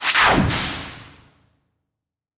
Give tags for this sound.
Fast; FX; Magic; SOund; Synth; Woosh

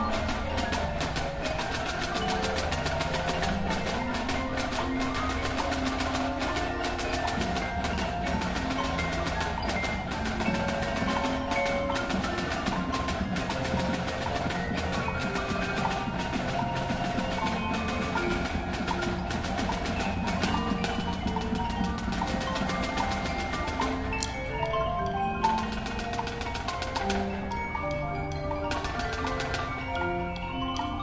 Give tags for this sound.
practice; football; music; college; prominent-snares-and-xylophones; band; prominent; percussion; cacophonous; snares; ambience; xylophones; noisy; practicing; band-practice; percussive

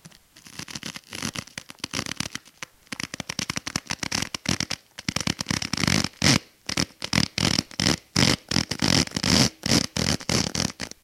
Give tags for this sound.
creepy; shoe

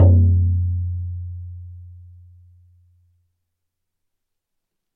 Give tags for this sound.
bodhran; drum; drums; frame; hand; percs; percussion; percussive; shaman; shamanic; sticks

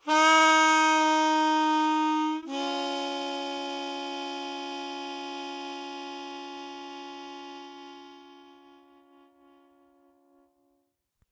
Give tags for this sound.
c; harmonica; key

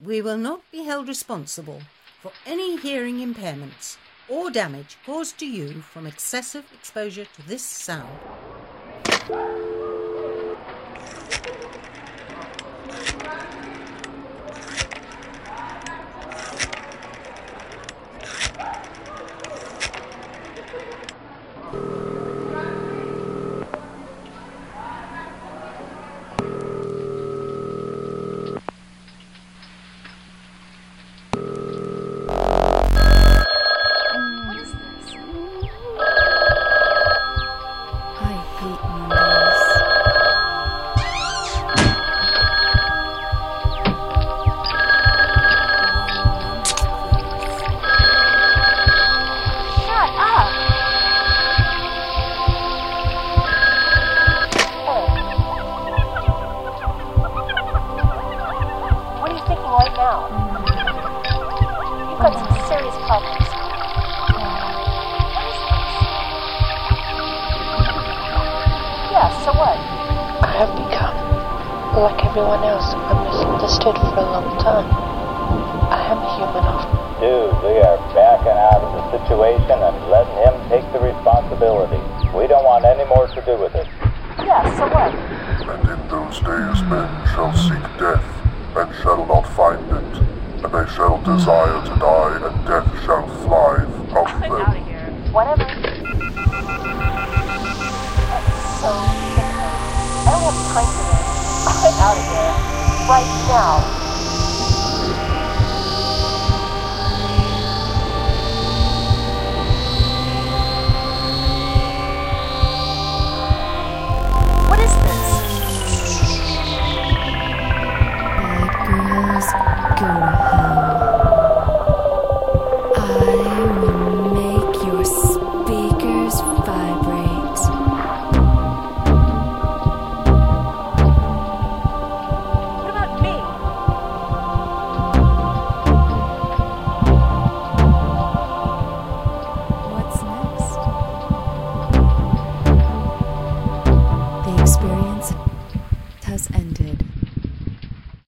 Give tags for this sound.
34301; 160k; 104865; 50493; 94639; 86390

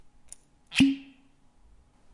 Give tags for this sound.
effect; opening; bottle; sound

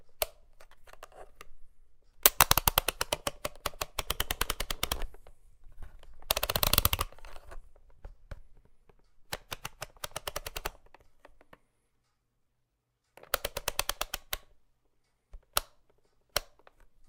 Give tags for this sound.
button; click; mechanical; rotating; selector

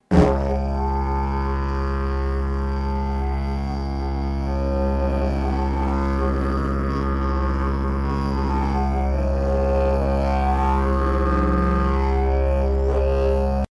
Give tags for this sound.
yidaki; harmonics; ovetone; didgeridoo

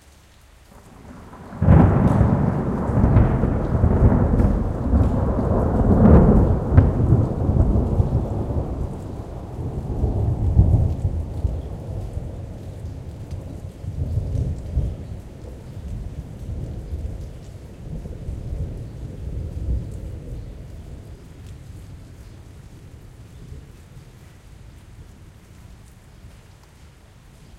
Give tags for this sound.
thunder; water; unprocessed

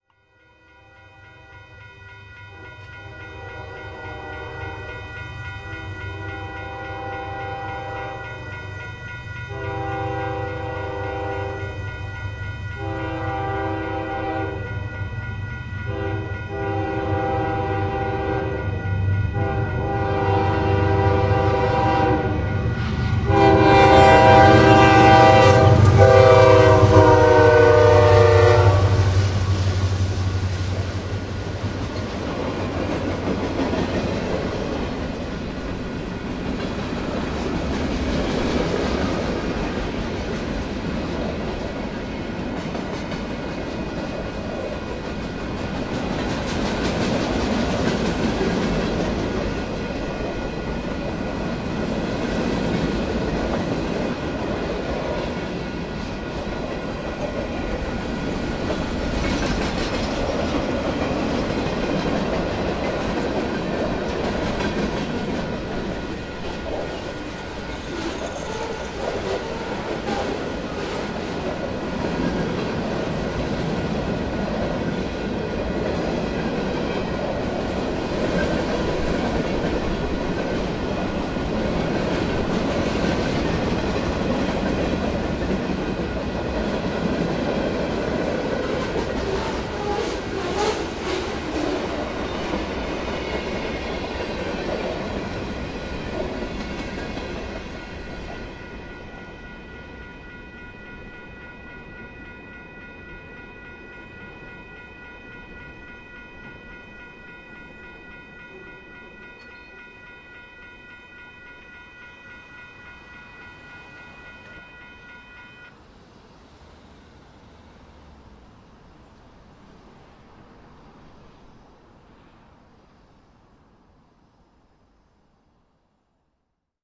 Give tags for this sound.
field-recording; industry; whistle; train; bells; crossing

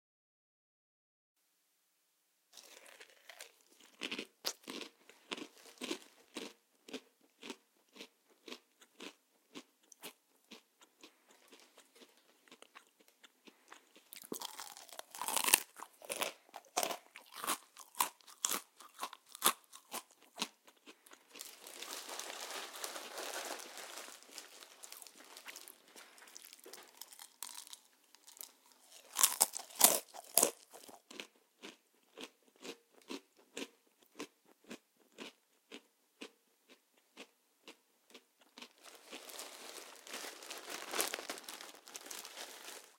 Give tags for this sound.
cereal; crunchy; chomping; crunch; chew; eating; cheerios; chomp; chewing

